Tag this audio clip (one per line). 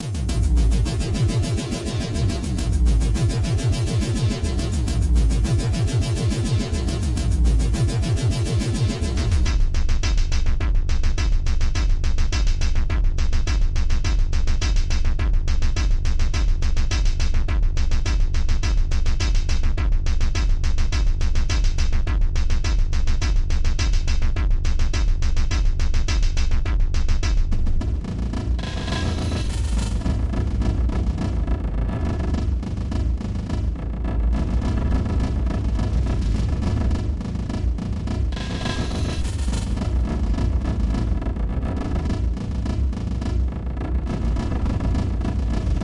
speed
processed
industrial
hard
core
beat